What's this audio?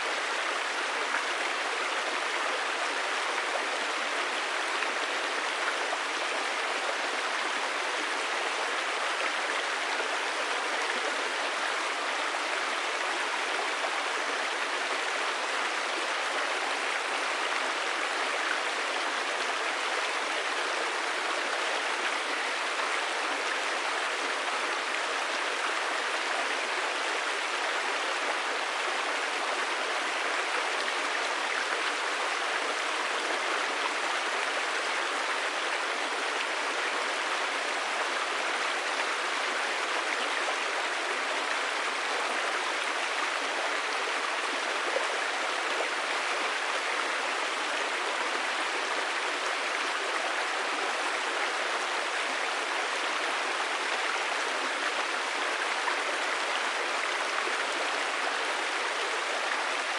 Small River 1 - Fast - Distant
Collection of 3 places of a smaller river, sorted from slow/quiet to fast/loud.
each spot has 3 perspectives: close, semi close, and distant.
recorded with the M/S capsule of a Zoom H6, so it is mono compatible.
perspectives, stream, water